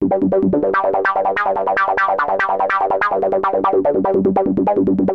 acid vision-1
done with analog gear.